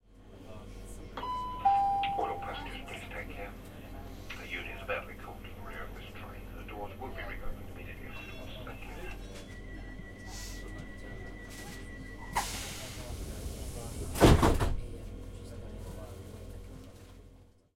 Train int idle passenger talking announcement door cloosing enginge hum
Recording from inside a train whilst a door is opening and closing and a announcement is made.
Equipment used: Zoom H4 internal mics
Location: About Letchworth Garden City
Date: 18 June 2015
announcement, door, int, passenger, talking, Train, UK